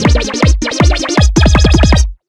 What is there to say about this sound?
short sound : 2seconds
sound cut with Audacity, then i did add the wahwah effect with the parameters, frequency : 4 and résonnance : 10.